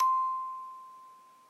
Recorded on an iPad from a musical box played very slowly to get a single note. Then topped and tailed in Audacity.
single-note, pitch-c6